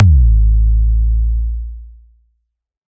Free Kick drum made with drum synth
sounds, Kicks, free, Drums